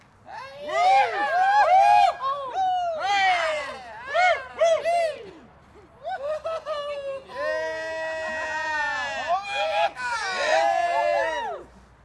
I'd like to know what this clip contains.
A group of people (+/- 7 persons) cheering - exterior recording - Mono.